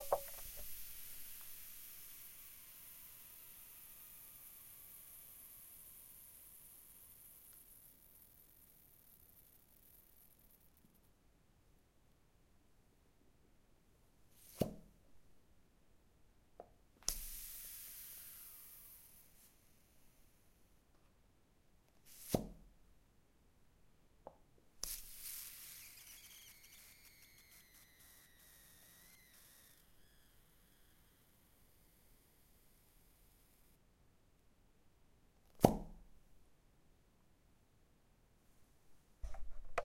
Plunger Pop
bath, bathroom, drain, drip, gurgle, liquid, plumbing, plunger, pop, pump, sink, squelch, squirt, suck, suction, toilet, tub, water